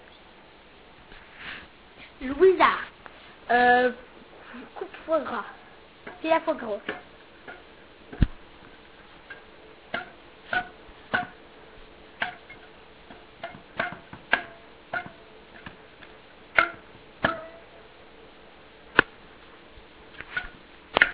Field recordings from La Roche des Grées school (Messac) and its surroundings, made by the students of CM1 grade at home.